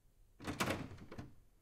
Insistent testing of a locked doorknob recorded in studio (clean recording)